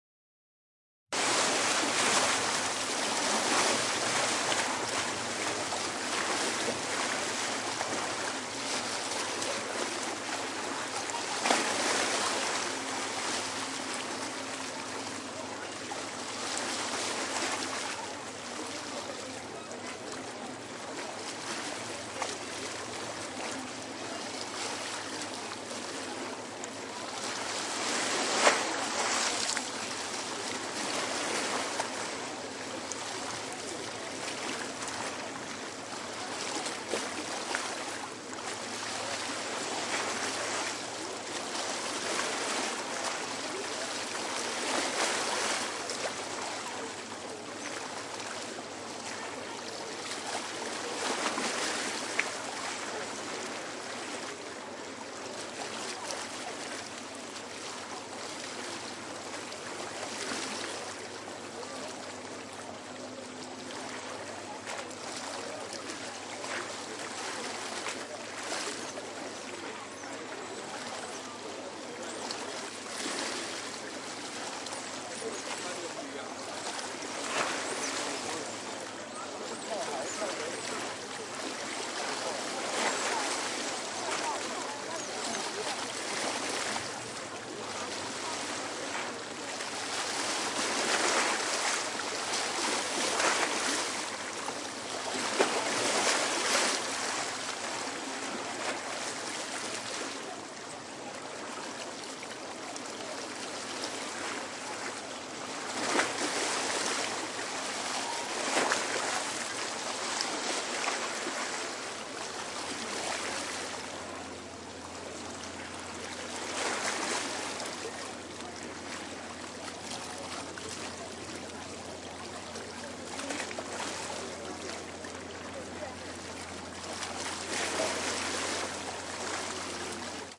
Taipei-Tamsui-River-side edit v2
River side field recording at Taipei Tamsui(大潮之日)
Taipei-Tamsui-River-side_edit_v2 (no ship noise version)
River, Taipei, Tamsui, field-recording, h2, zoom